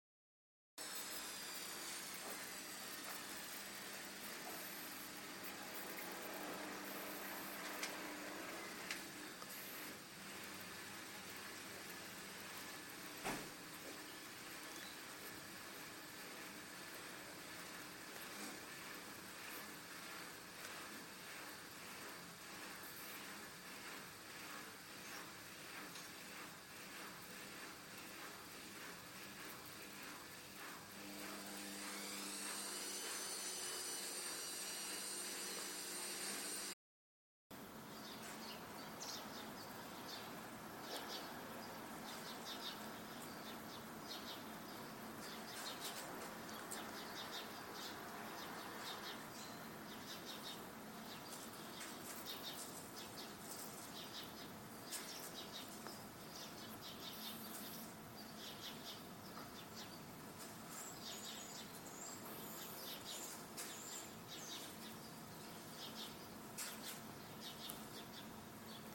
So de la casa
El meu contingut sonor és una fusió de contrastos entre els sons produïts a un pati de llums,amb les rentadores funcionant i els sons del carrer un bon matí al meu poble,amb els ocellets i la tanquilitat del matí.